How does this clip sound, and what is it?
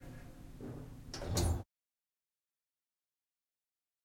Part of a bunch recordings of an elevator. One of the sounds being me sneezing.
I find these sounds nicely ambient, working well in electronic music that I myself produce.
elevator, field-recording, door